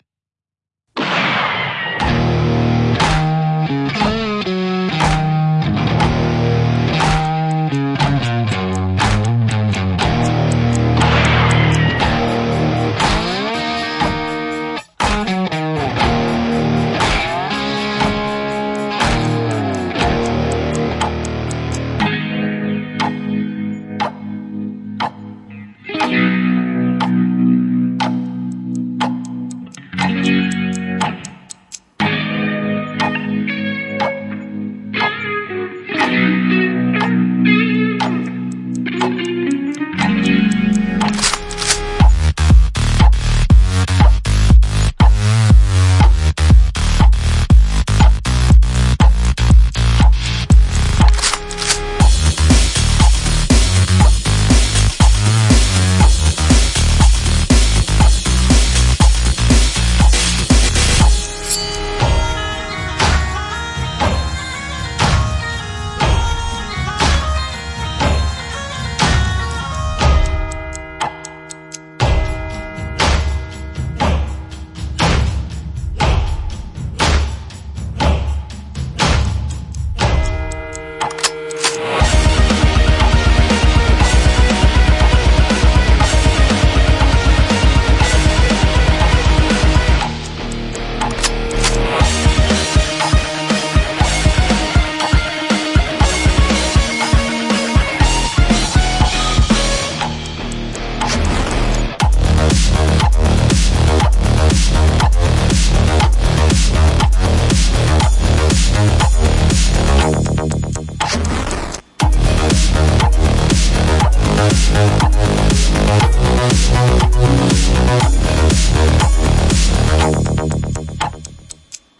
20 Second Genre Swap Music
Track which is divided in 10 second sections and changes genre every 20 seconds.
Each section is 2 4/4 parts and a 2 bar fill.
rock, western, 60bpm, drums